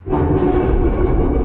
Dino Roar 1
A terrifying distant-sounding dinosaur roar I made by scraping my fingernails over a guitar string, with added effects such as pitch shift and reverb.
monster, terrifying, roar, distant, dinosaur, shriek